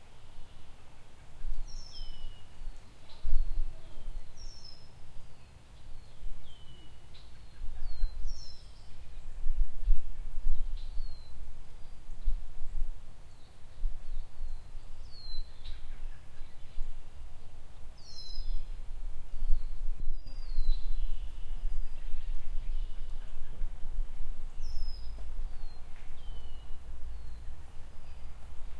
Philadelphia suburb bird songs
Sunset bird songs recorded outside of Philadelphia, PA, USA, in May of 2020.
ambient, spring, nature, ambience, field-recording, birds, ambiance